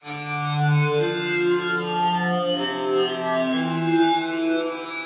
cello wow 95
A cello processed to hell, lol
trance, techno, house, 95, bpm, hard